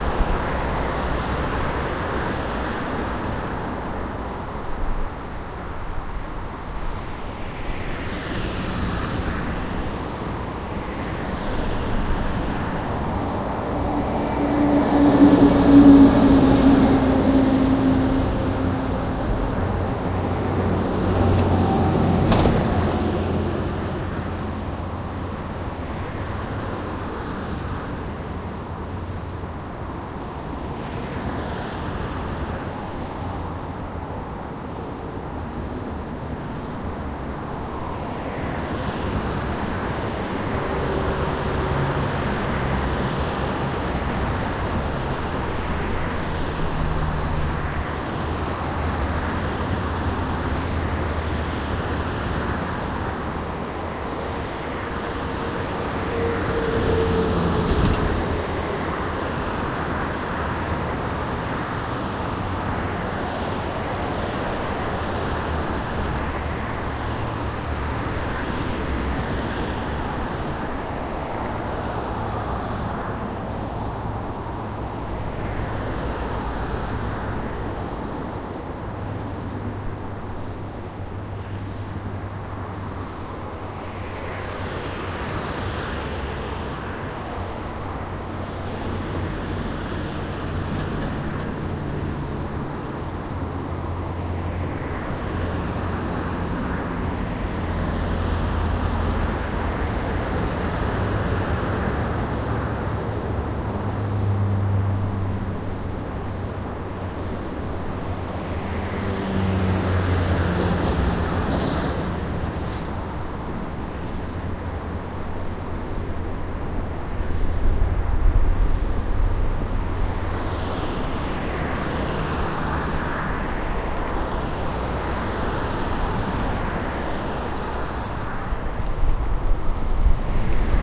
Budapest Thruway
Sound of the M3 thruway near the border of Budapest.
thruway, cars, budapest